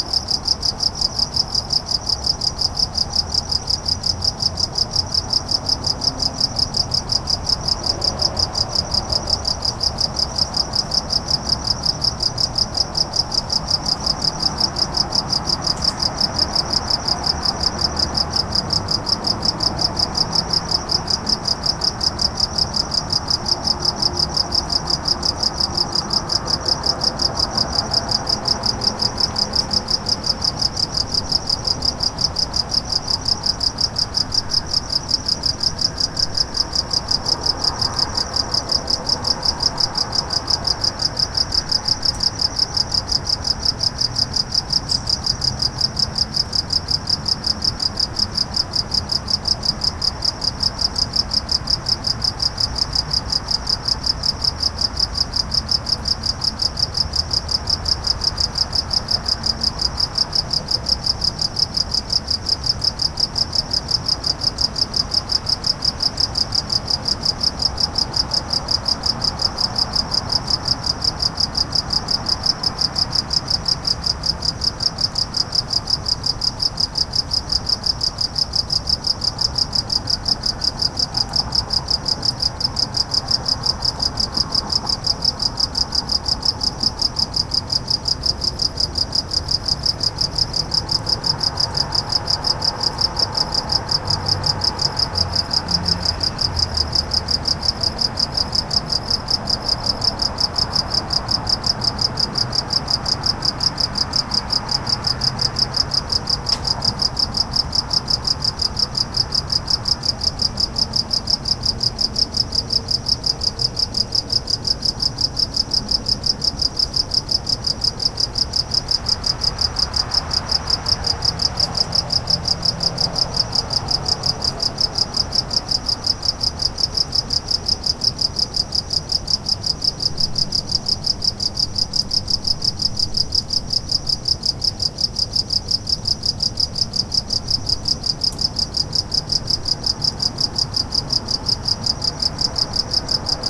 crickets car sounds
2x Rode-m5 in XY to Scarlett 2i2
Crickets and distant cars passing in urban ambience.
ambience, bugs, cars, chirp, chirping, cricket, crickets, field-recording, insects, nature, night, urban, XY-coincident